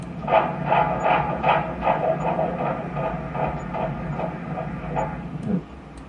Latido de bebé a las 19 semanas de gestación.